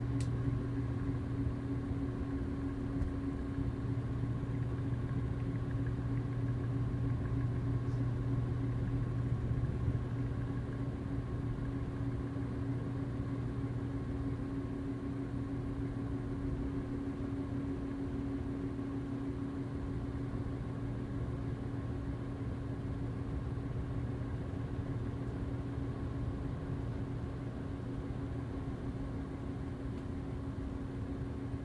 Ceiling Fan Closeup Hum
NOT IN STEREO. Hum of my ceiling fan.
Setup:
Zoom H1 (for stereo sounds)
Rode Videomic NTG (for mono sounds)
fan, hum, ceiling-fan